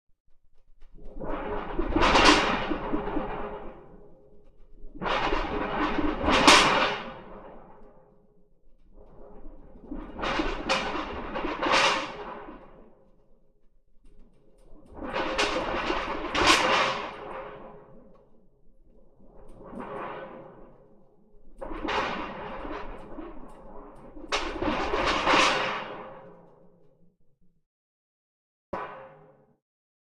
Shaking zinc to create a rumble, similar to thunder. Soft and increasingly loud rumbles.
OWI, Thunder